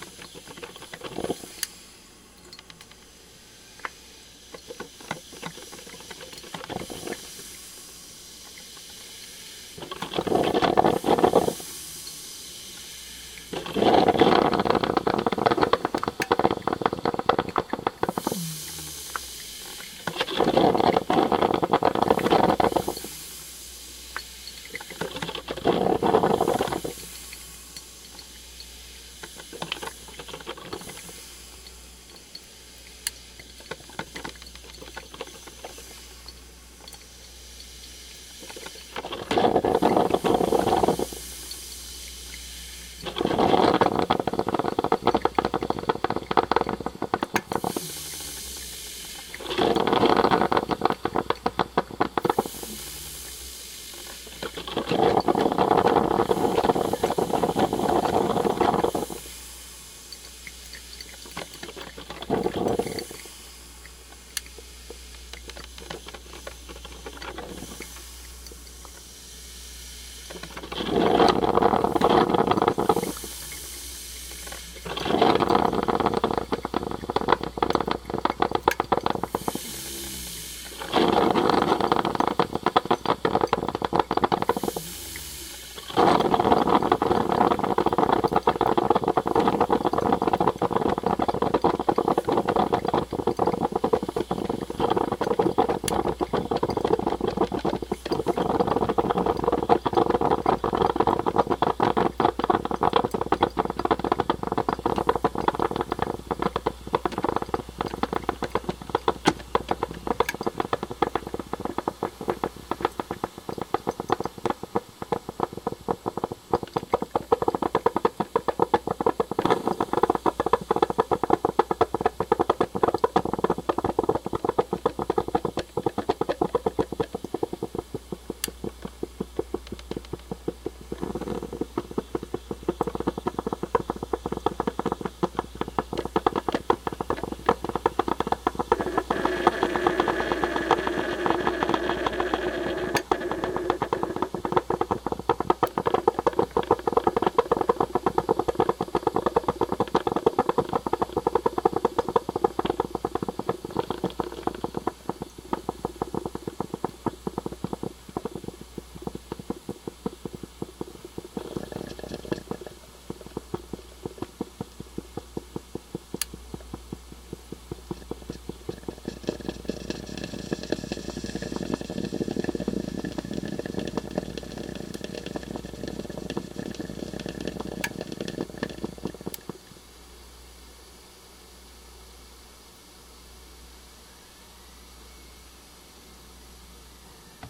Coffee Percolating Figuried
Was making some coffee this morning and decided to just shoot some audio of it with my tascam and shotgun mic. Enjoy :)